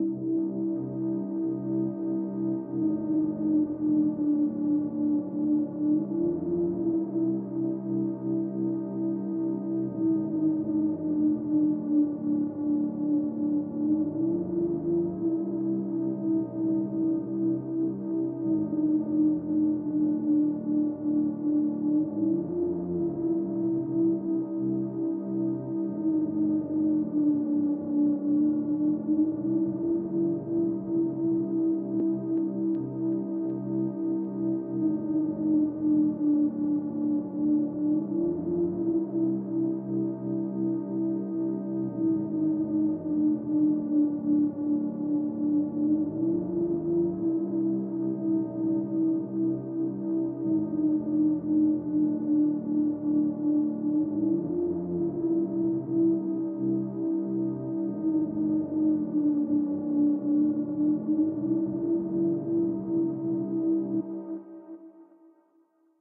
sine made in ableton
sine, operator